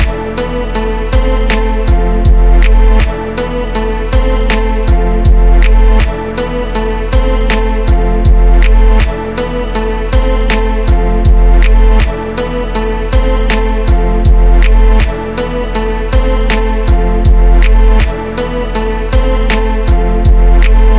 Hip-Hop Loop {Confused}
Another simple one! Hope you enjoy! Created on Fl Studio using Nexus, Gross Beat, TheBigBangTheory drum pack. 2/8/15 6:34pm
New, Music, Battle, Hip-Hop, years, Free, Fight, Drum, Loop, Beat, 2015, Fantasy, Kick, Trap, Snare, Confusing